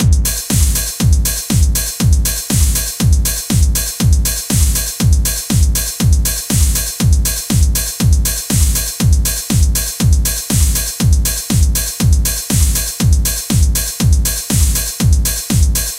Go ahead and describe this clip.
PapDrum 2 4/4 120bpm
This is part 2 of the same drum line from a recent song I made.
120-bpm, 4, drum-loop, hard, quantized, techno